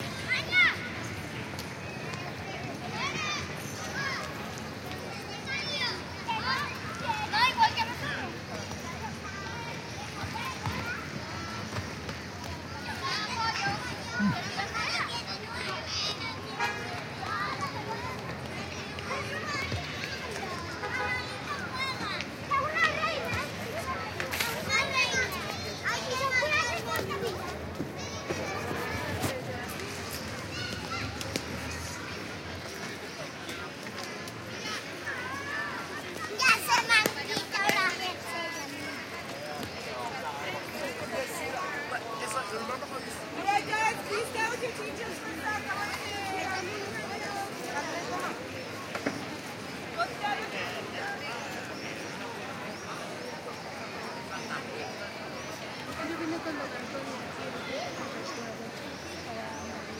ambiance at Plaza Nueva, Seville (Spain), mostly voices of children playing, little or no traffic noise. Recorded as I walked around 8 pm. Pair of Soundman OKM mics (in-ear) and Edirol R09 recorder
playing, children, field-recording, soundwalk, ambience, voices, spanish